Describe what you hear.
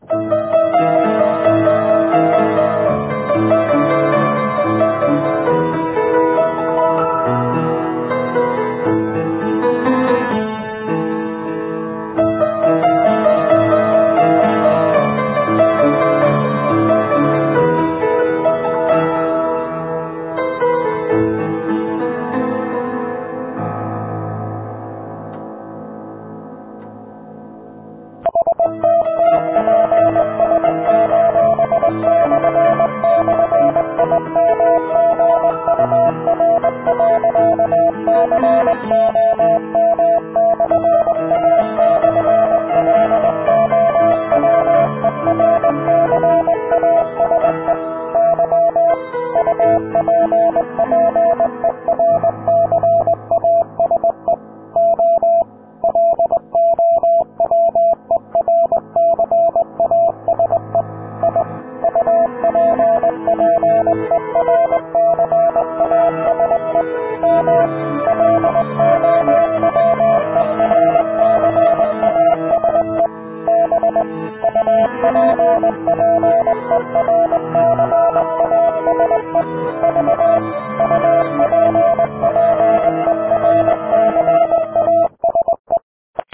piano effrayant pour garder meximito éveillé la nuit.